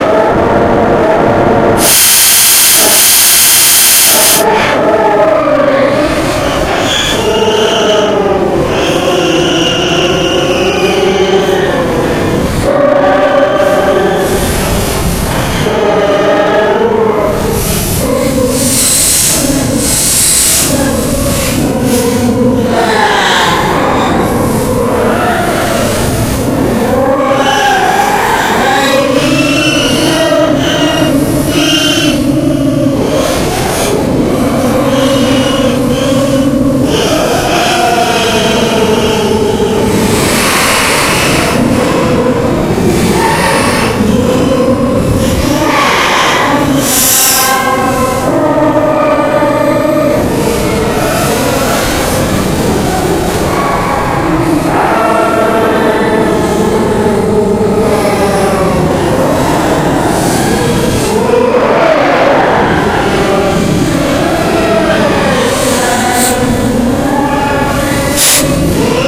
Edited version of one of my office recordings processed with Paul's Extreme Sound Stretch to create a ghostlike effect for horror and scifi (not syfy) purposes.
demonic, evil, ghost, haunting, paranormal, scary, spooky, stretch, texture, voice